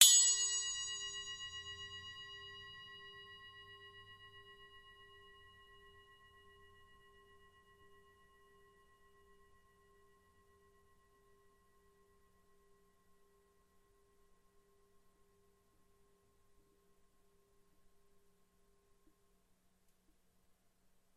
zilbel 6in med1
After searching the vastness of the interweb for some 6 inch zilbel samples with no luck, I finally decided to record my own bell. Theres 3 versions of 4 single samples each, 4 chokes, 4 medium and 4 hard hits. These sound amazing in a mix and really add a lot of life to your drum tracks, they dont sound over compressed (theyre dry recordings) and they dont over power everything else, nice crisp and clear. Ding away my friends!
zildjian-zil-bell, zildjian-6-inch-bell, zil-bell, zildjian-bell, zilbel, zildjian-zilbel, zildjian, bell, 6